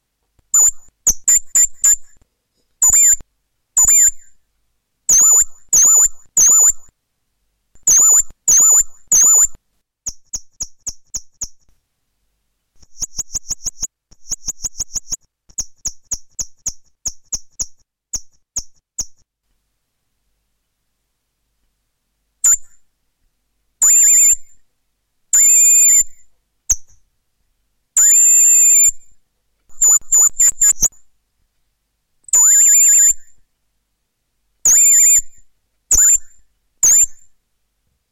This birdie namnam requires a speaker system with good performance up to 15 kHz. Number 4 in a series. The best ones will not be allowed for unlimited copying. But the first ones are not bad either.
songs, birds, artificial